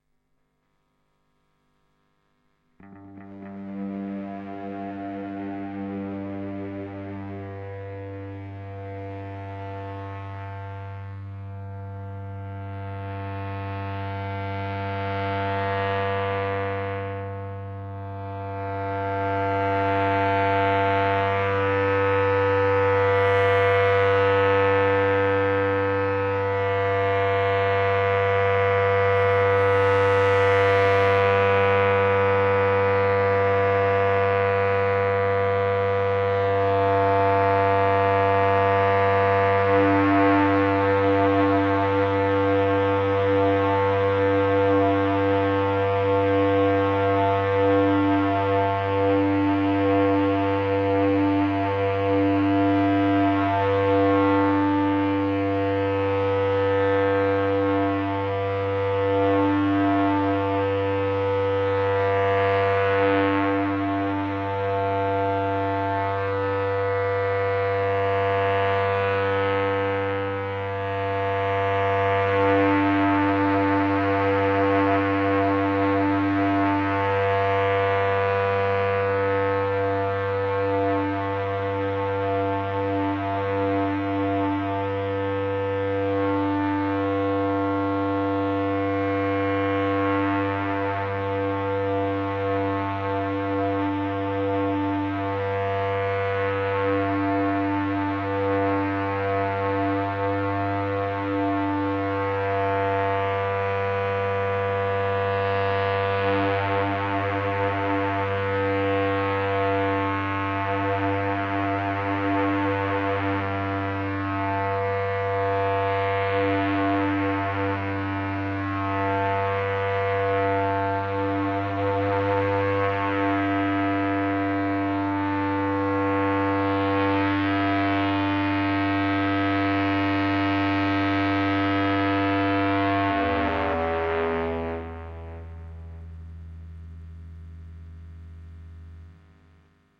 EBow guitar drone - G2
An EBow-ed guitar drone in the key of G minor
In this instance the note sustained is G2
Performed with an EBow on a Gibson SG with P90 pickups
Can be layered with the other drones in this pack for a piece of music in Gm